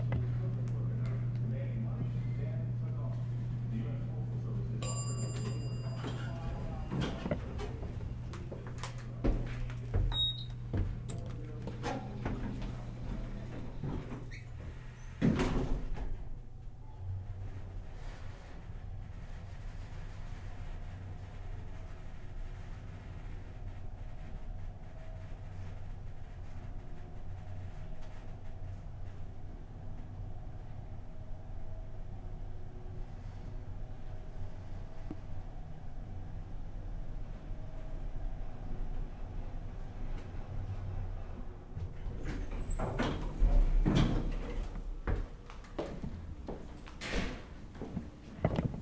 Elevator ride
riding an elevator 8 floors up
ding, doors, elevator